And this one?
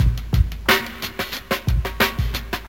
Drumloop - Classic Breakbeat 3 - Funky Drummer (90 BPM)
The classic funky drummer beat, originally made by Clyde Stubblefield for James Brown, at 90 BPM... just that.
Exported from HammerHead Rhythm Station.